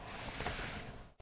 fh Paper Swipe Surface1 Mid 01
swiping paper over table
swipe, paper